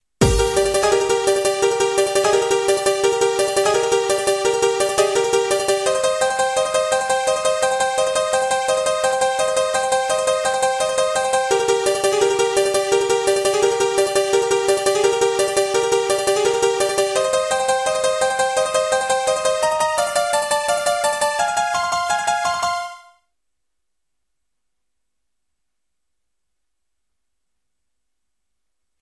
01-THE CERTAINTY 2
Part of an unfinished tune i first wrote.